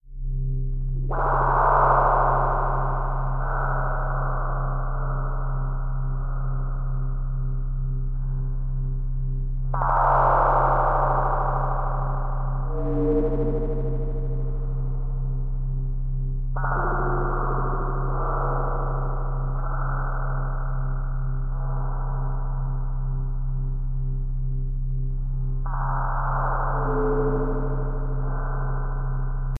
Alien Atmosphere
A short Sound FX we produced with a synth plugin to replicate a classical alien sounding atmosphere.
alien, space, world, bionic, spaceship, galaxy